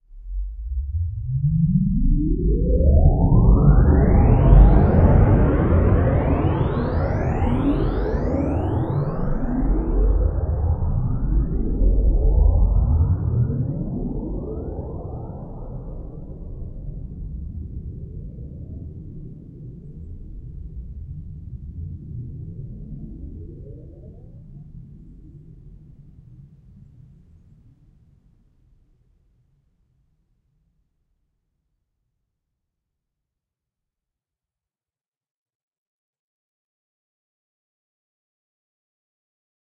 Something big just left, not sure what it was.
alien, atmosphere, atmospheric, sound-fx, space, synthetic-atmospheres, technology